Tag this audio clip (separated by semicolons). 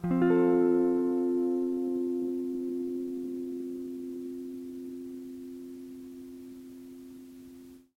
collab-2; el; guitar; Jordan-Mills; lo-fi; lofi; mojomills; tape; vintage